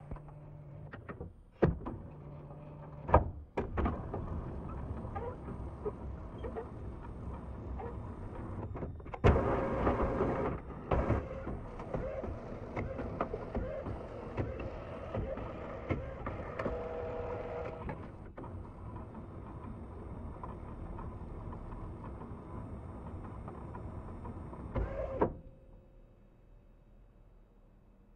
The sound of robotic drones. Motors turning, belts moving, etc. Composed by modifying the sound of a printer startup sequence.
The effect was created by applying reverb and a pitch shift to the source sound, and filtering out some of the paper contact noises.